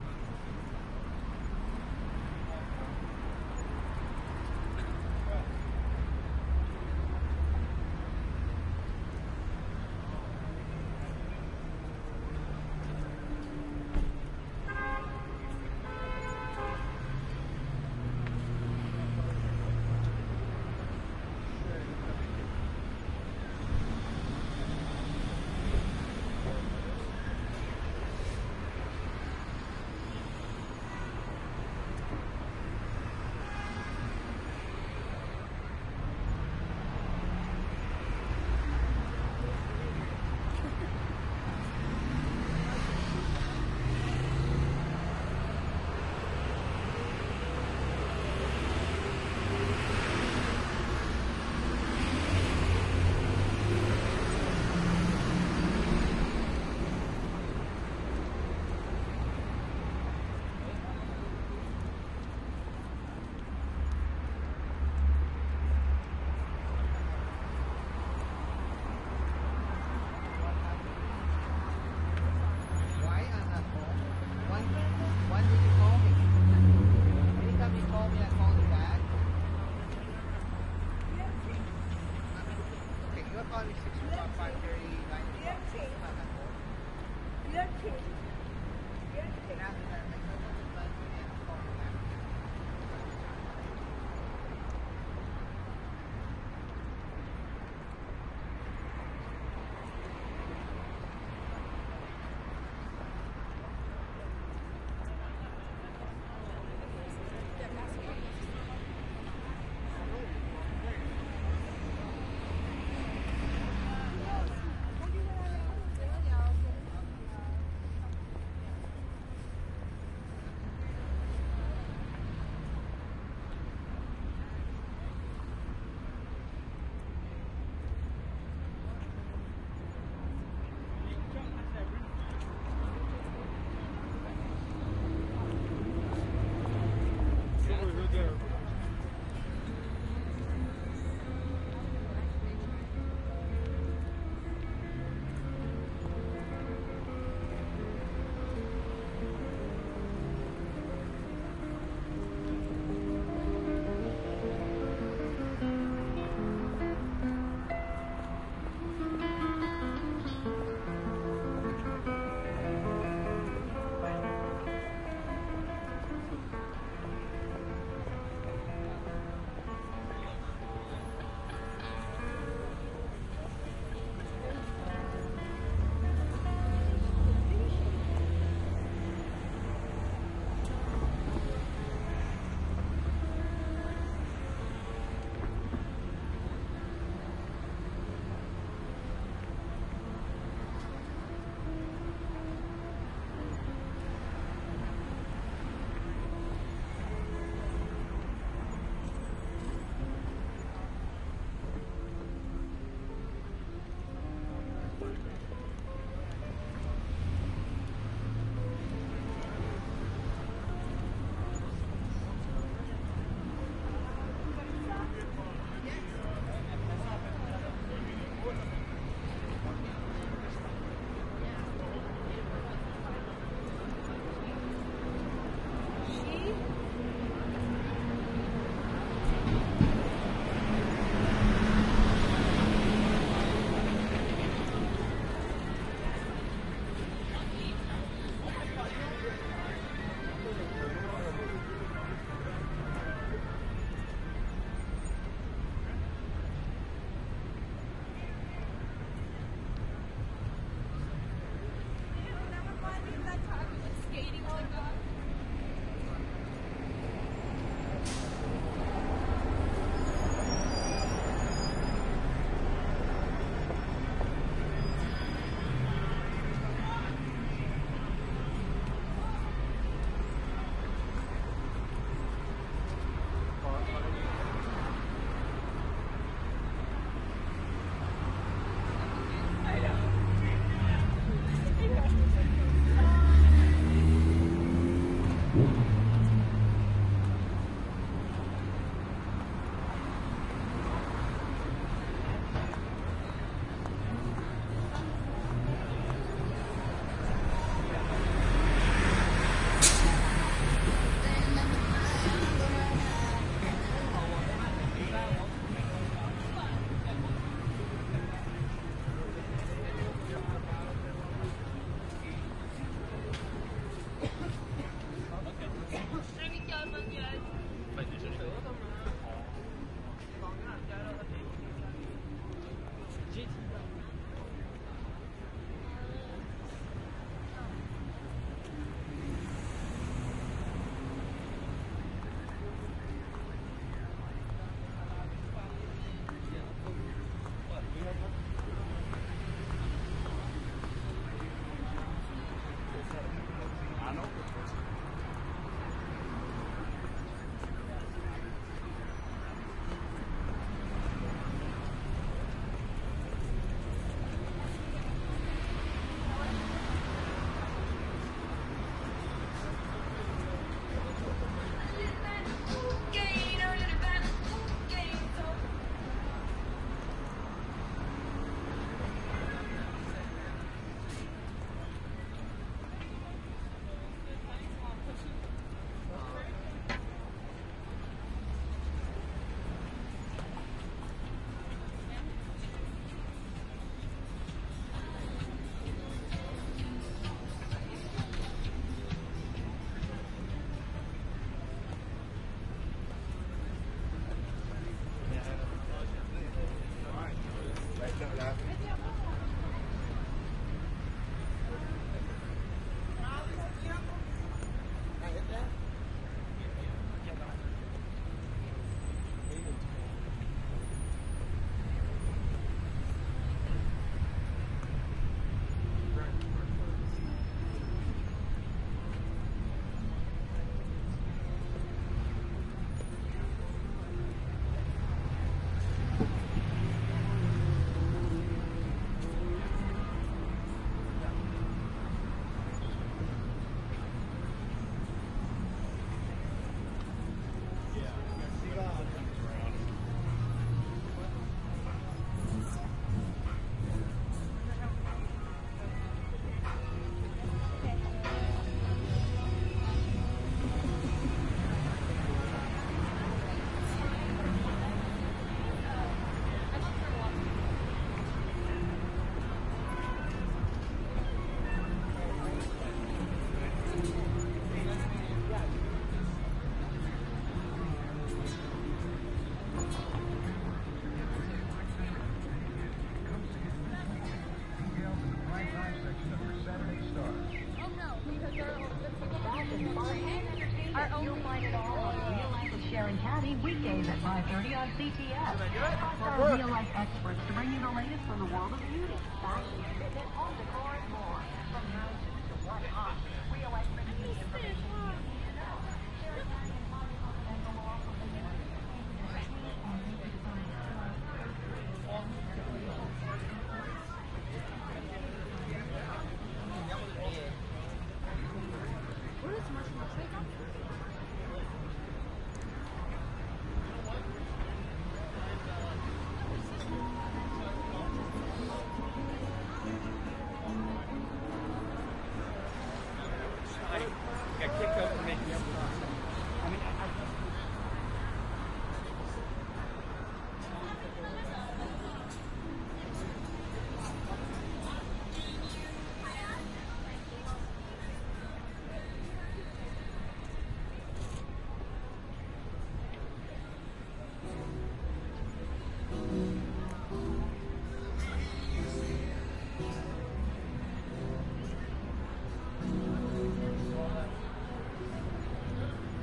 walking toronto 01
Walking aimlessly around Toronto Canada. I don't remember exactly where I was.Recorded with Sound Professional in-ear binaural mics into Zoom H4.
binaural, canada, city, crowd, field-recording, noise, outside, people, phonography, street, toronto, traffic